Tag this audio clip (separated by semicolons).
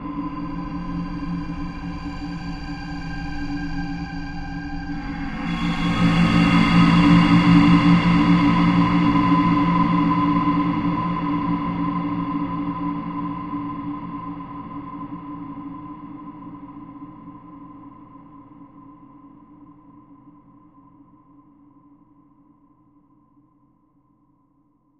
deep; soundscape; ambient; drone; space